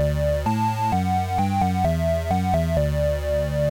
Audiosample maj version
A simple melody in D minor